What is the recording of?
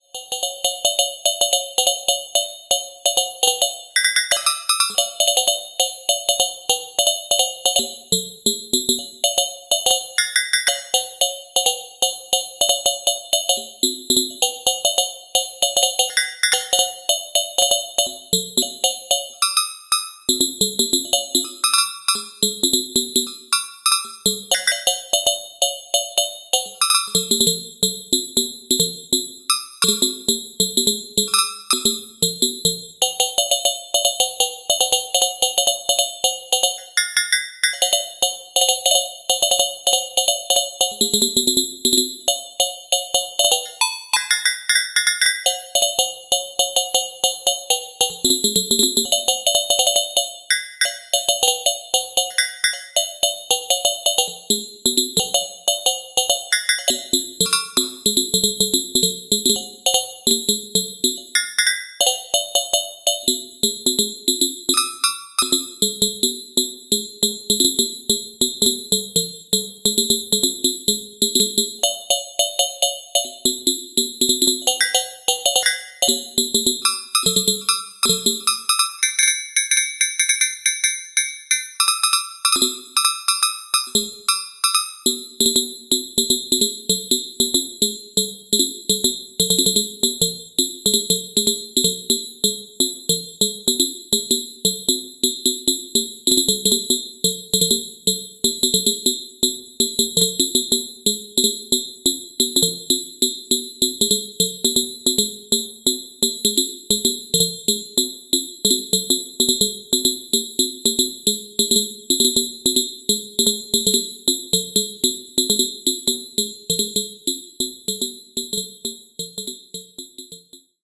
Algorithmic phase modulated mallets.